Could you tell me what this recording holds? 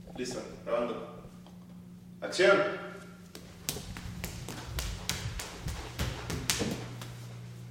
Barefoot runwav
barefoot, footsteps, running